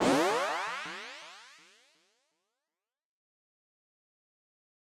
Bouncing Power Up 1 1
In-game power-up type sound made using a vintage Yamaha PSR-36 synthetizer. Processed in DAW with various effects and sound design techniques.
Bouncing, Classic, Design, Game, Happy, Notification, Player, Power, PSR-36, Retro, Sound, Synth, Synthetizer, Up, Video, Vintage, Yamaha